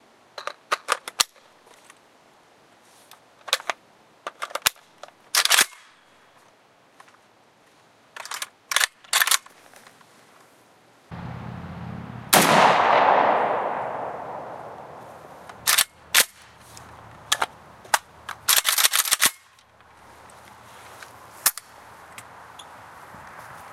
Sounds from a video I took of me shooting my AK47 style rifle, sound file includes a gunshot and reloading/manipulation sound effects.
just post a link to the final product so I can see my sound being used.